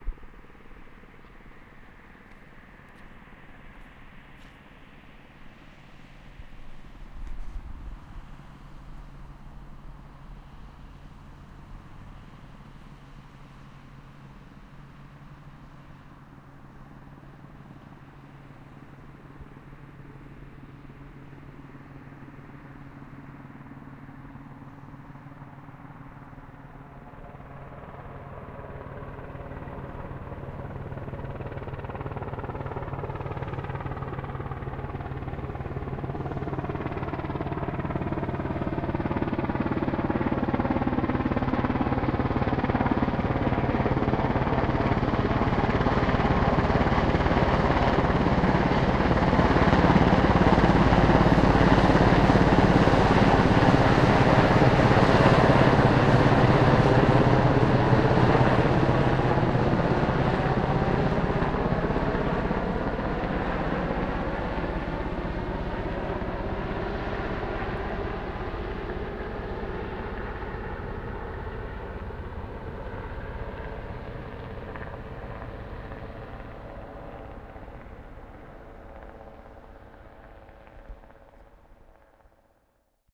Sea-king Helicopter
Field recording British Sea King helicopter flying over and landing at Leeuwarden airbase Netherlands.
Recorded with Zoom H1
aircraft, army, chopper, copter, field, field-recording, fieldrecording, flight, flying, heli, helicopter, recording, sea-king